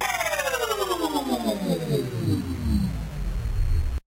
Manipulated Organ
created using an emx-1